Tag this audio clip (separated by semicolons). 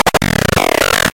glitch; computer; annoying; digital; sound-design; random; noise-channel; damage; noise; noise-modulation; file